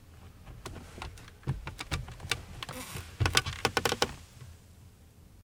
chair sitting 1
By request.
Foley sounds of person sitting in a wooden and canvas folding chair. 1 of 8. You may catch some clothing noises if you boost the levels.
AKG condenser microphone M-Audio Delta AP
creek, sit, soundeffect, foley, wood, chair